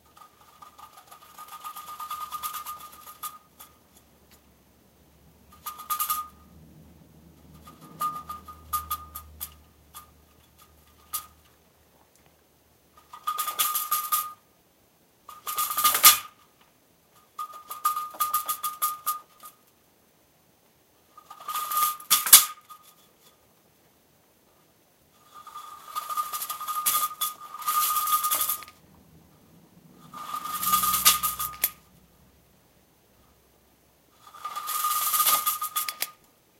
playing-the-heater2
Recorded in a hotel's bathroom - heater with bars is really great instrument.
heater, bathroom